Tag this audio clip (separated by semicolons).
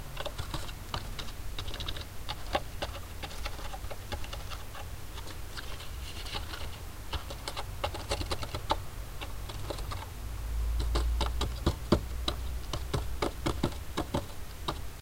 bird; knocking; starling